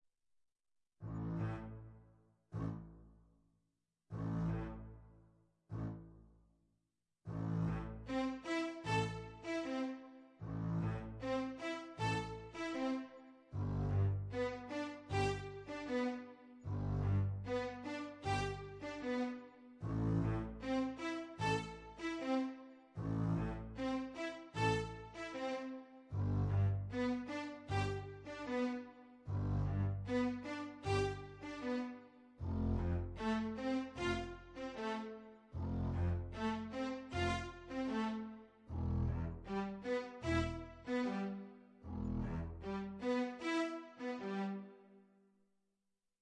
royal music loop
A small loop with royal music made for multiple purposes. Created by using a synthesizer and recorded with Magix studio. Edited with audacity.